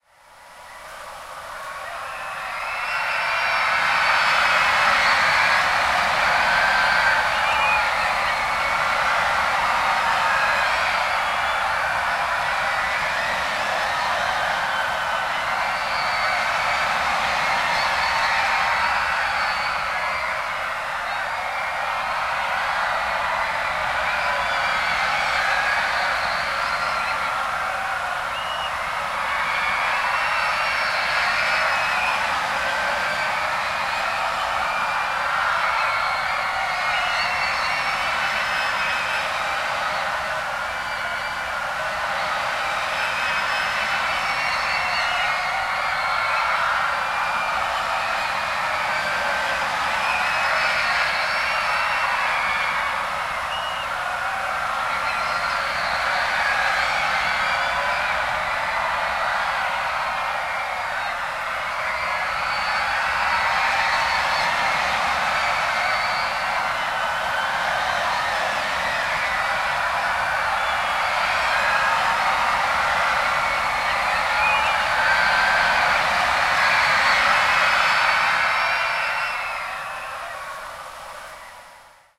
For my 666th upload, here's something rather chilling - several hundreds of people screaming. Created by stitching together nearly 200 of my own recordings of roller coaster screams at Disney's "Expedition Everest". I took the result and pasted it in reverse for added discomfort. Reduced frequencies below 500Hz due to the roller coaster rumbling. A lot of unfortunate cicada clutter in the higher ends, but should probably blend well with other screaming sounds.
An example of how you might credit is by putting this in the description/credits:
And for more awesome sounds, do please check out my sound libraries or SFX store.
The sound was recorded using a "Zoom H1 recorder".
Originally recorded on 9th and 10th August 2017, and edited together in Audacity on 15th March 2018.